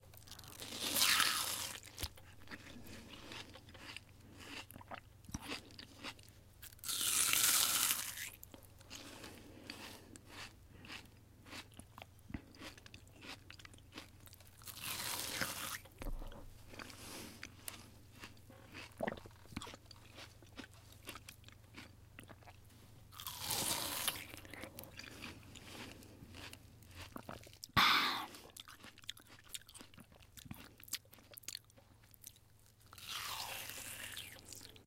eating watermelon
watermelon, eating